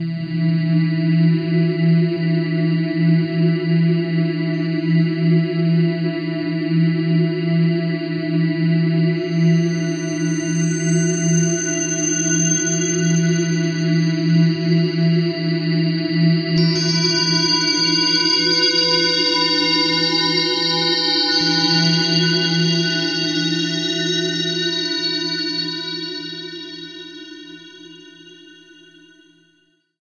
THE REAL VIRUS 06 - BELL DRONE - E4
Drone bell sound. Ambient landscape. All done on my Virus TI. Sequencing done within Cubase 5, audio editing within Wavelab 6.
ambient, bell, drone, multisample